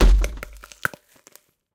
Bone Breaker 1
Sound produced from a Bell Pepper being ripped into with my finger nails. Needles to say, it was very succulent and sweet after I took it apart, seeds first. The "wham" sound is simply a slightly edited pitch-wise banging of a plastic bin lid.
I will upload a non "wham" just the "tick-ti-tick".
break, flesh, gore, horror, horror-effects, horror-fx, slash, squelch, torso